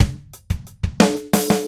I made these loops about 1yr ago for a project I was working on. I know how difficult it is to find free drum loops in odd time signatures, so I thought I'd share them